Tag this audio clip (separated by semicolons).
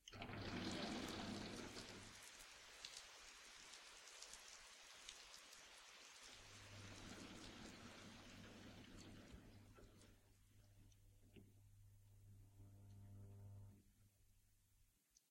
garage; engine; garage-door